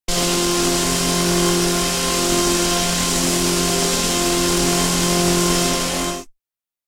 sci-fi sounding